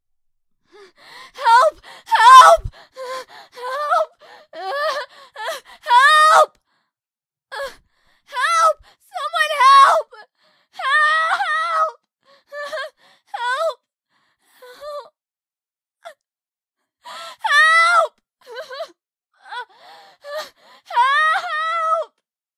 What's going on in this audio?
Female screaming for help
female, request, vocal, girl, help, acting, woman, hurt